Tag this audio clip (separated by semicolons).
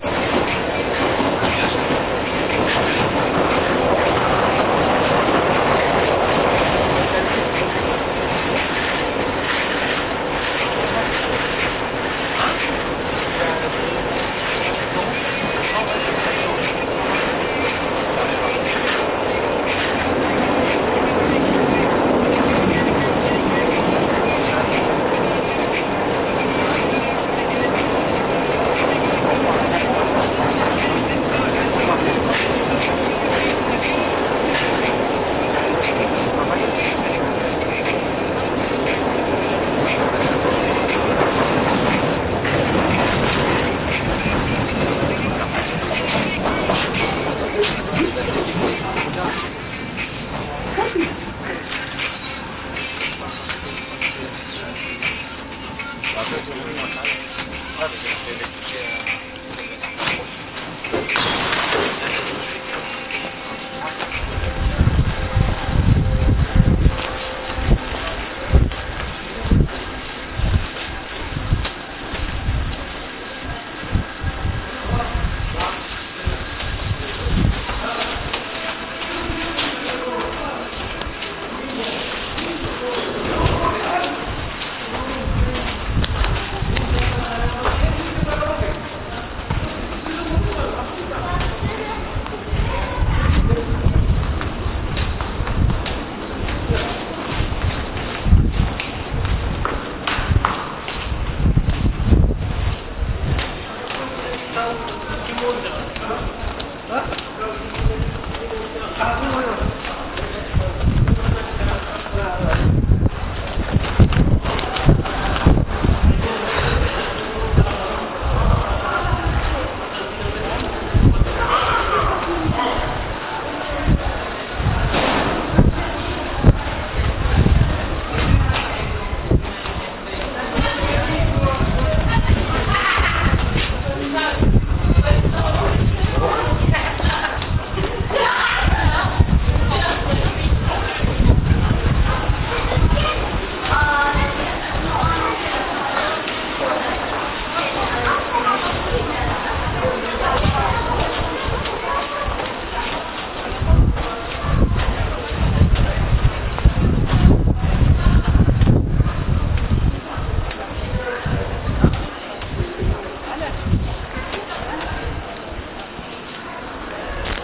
paris; subway